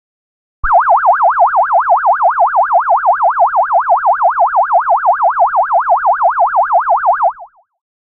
8 bit UFO
A "retro" sounding effect reminiscent of the sound for the UFO in "Space Invaders," created by me whilst fooling around on Logic Pro.